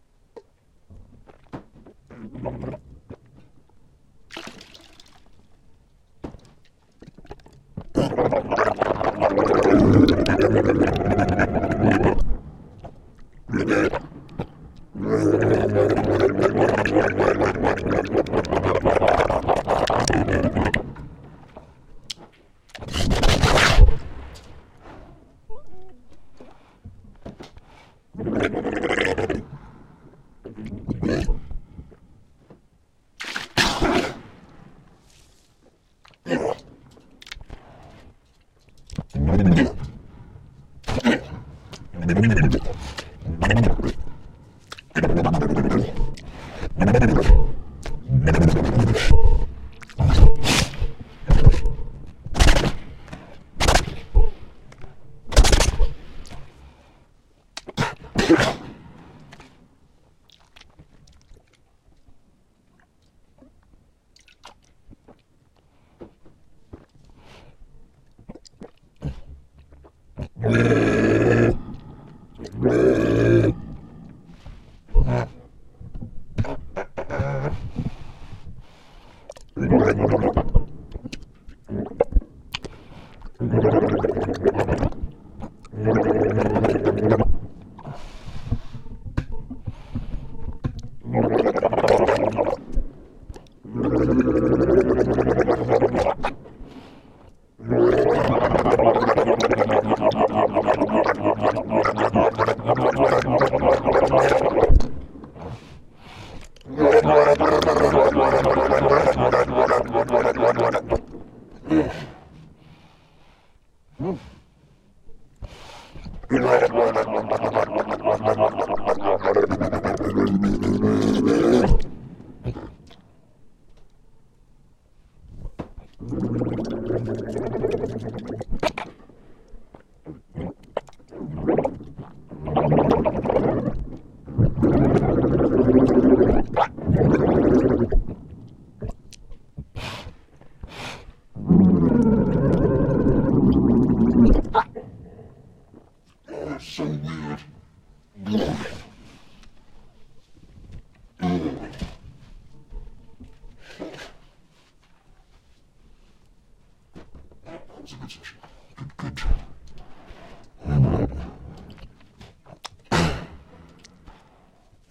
Noises I made with my mouth into a Superflux PRO-268A and then heavily processed using compression, multitracking and messing around with formants and pitch.
I tried to make these sound like a large monster with a lot of water in its mouth, perhaps if it's some kind of slime demon or something?
There's a bit of bad language in the middle because I felt like I was drowning. At some point in the future I'll cut these files up into smaller pieces and remove irrelevant parts.